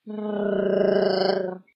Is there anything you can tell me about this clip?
Made this for a LEGO Film that I was doing. Me doing a Wookie growl.
Wars, Star, Growl, Voice, Wookie